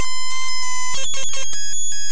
A high pitched computer glitch sound
Technology, Glitch, Computer